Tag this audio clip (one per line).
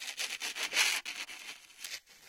clang
cycle
frottement
metal
metallic
piezo
rattle
steel